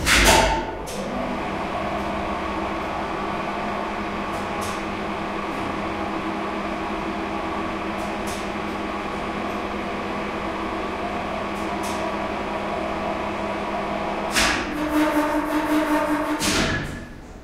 An old Soviet/Russian elevator running on low speed.
Before an elevator reaches the stop point it enters precise stop point when its motor switches to the lower speed. When it runs on low speed it produces pretty industrial sound.
This is elevator nr. 9 (see other similar sounds in my pack 'Russian Elevators')
elevator, engine, hum, industrial, machine, mechanical, motor, noise